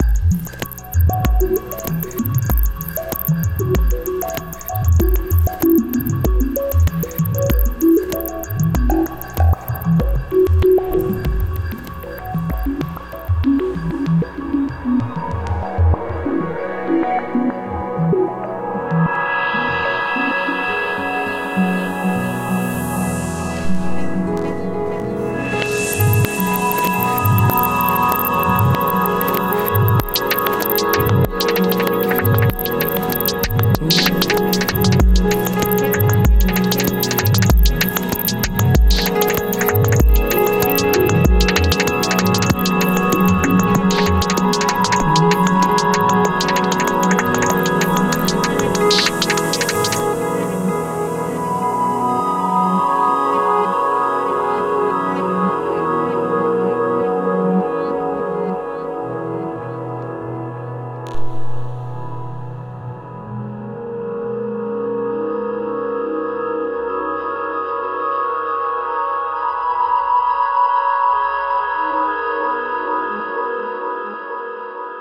OHC 370 - Bubbly
Bubbly Experimental Beat Liquid Effervescent
Liquid, Beat